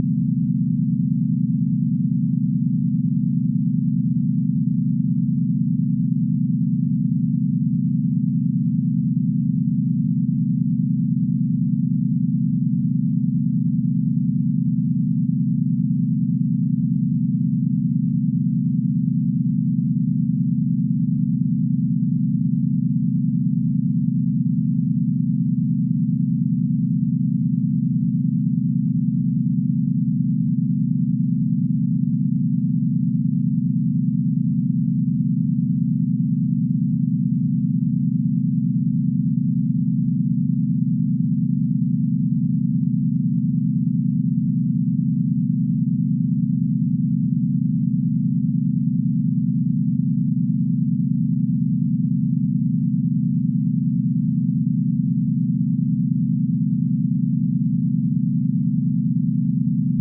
1-octave--7-notes
Have you ever wondered how an octave of simultaneously played 7 notes sounds like? Here it is (notes from C to B, 12-TET logarithmic scale with A=220Hz), made of equally loud, pure sinus tones.